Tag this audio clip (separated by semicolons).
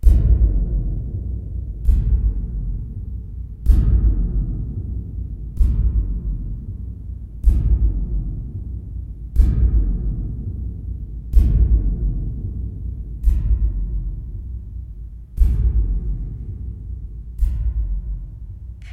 dinosaur; dinosaure; footstep; footsteps; pas; step; steps; walk; walking; walks